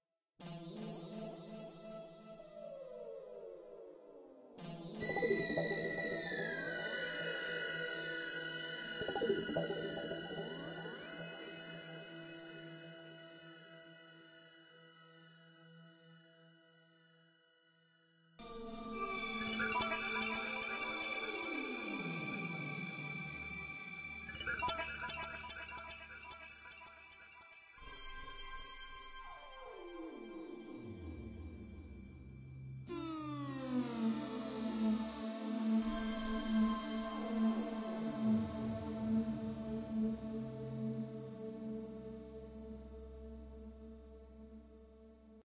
falling, portamento fX sounds created with the Roland VG-8 guitar system
zapping, meteors, falling